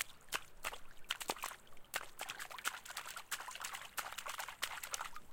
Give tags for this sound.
hihat water hit

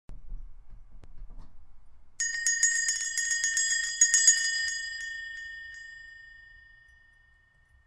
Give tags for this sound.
ring Bell

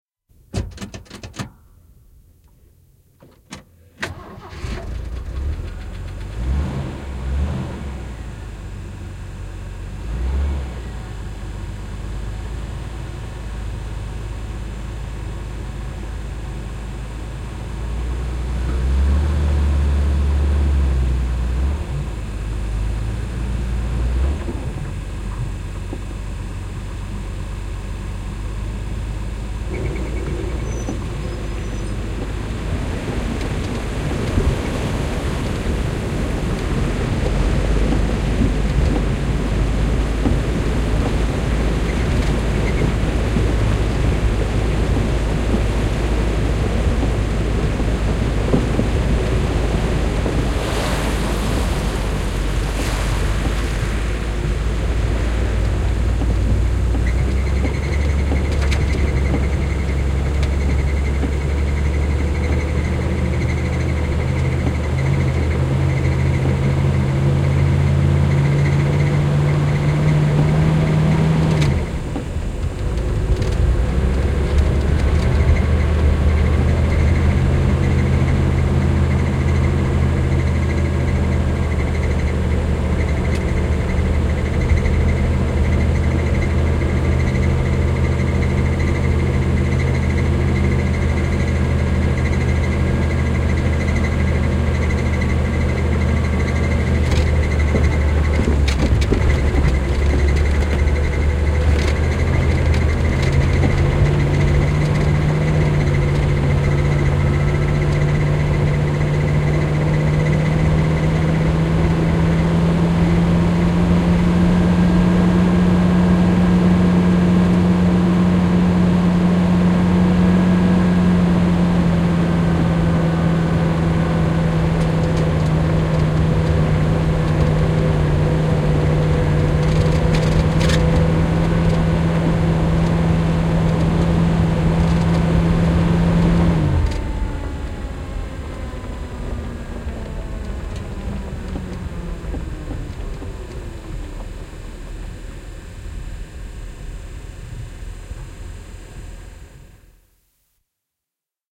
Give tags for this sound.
Vesi Maasto Tehosteet Joki Country Car Yleisradio Autot Yle Cars Terrain Auto Soundfx Finnish-Broadcasting-Company Islanti Field-Recording Water Iceland River

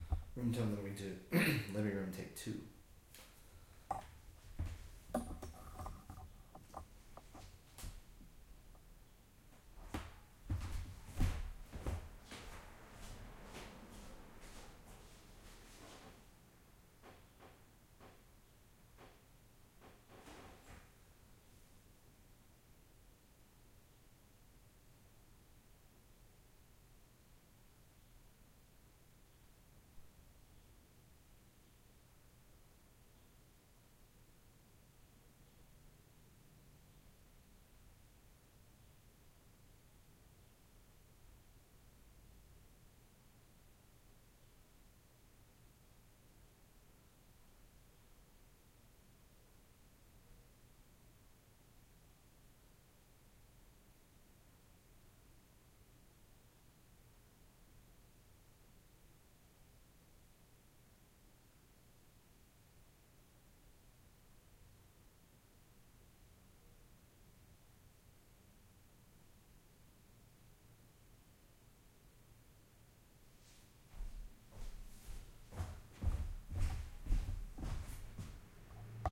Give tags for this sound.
roomtone field-recording interior house

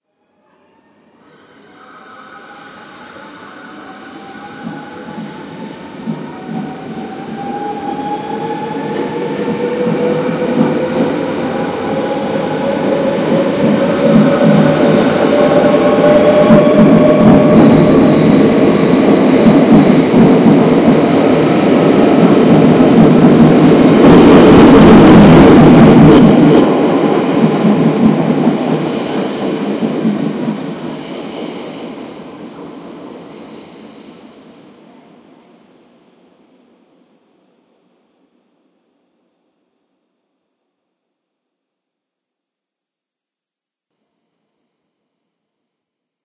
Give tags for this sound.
1600 amsterdam Class diesel freight locomotive NS Railon spoorwegen station train

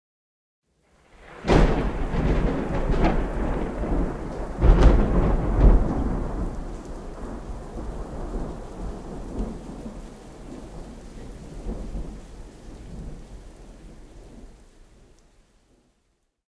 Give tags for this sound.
filed rain recording thunder weather

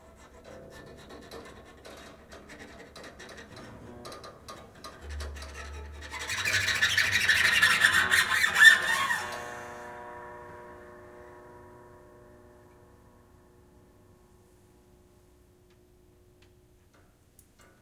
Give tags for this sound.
acoustic effect fx horror industrial piano sound soundboard sound-effect

